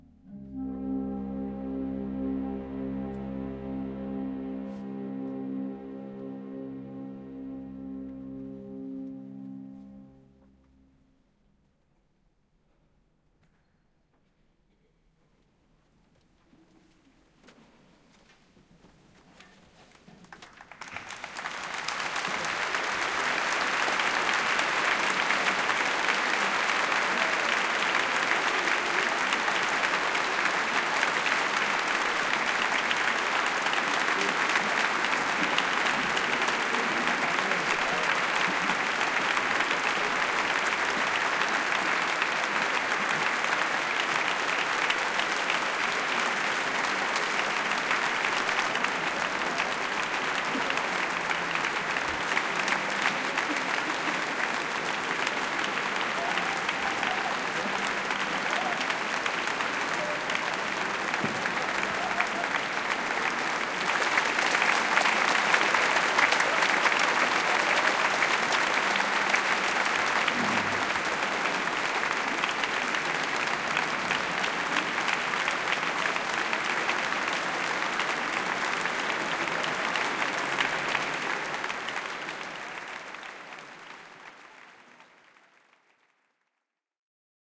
Concert Ambience Applause Beginning
Ambience, applause and first notes of the orchestra at the beginning of a classical concert in St. Stephan's Cathedral (Stephansdom) in Vienna, Austria, spring 2012. Audio taken from video camera.